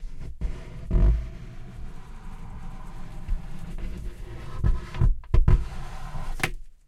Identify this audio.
rustle.box-changes 1
recordings of various rustling sounds with a stereo Audio Technica 853A
box, break, cardboard, crash, tension